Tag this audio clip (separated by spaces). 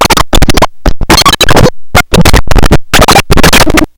murderbreak core coleco glitch circuit-bent just-plain-mental bending rythmic-distortion experimental